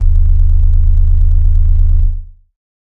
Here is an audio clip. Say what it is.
fake analog bass 2c
deep electronic bass sound
bass, electronic